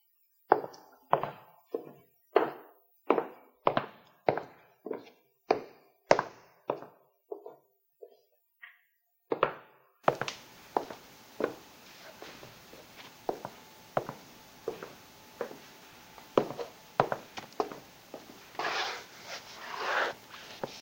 Recorded with polaroid cell phone E P4526
walking over a wood floor with my boots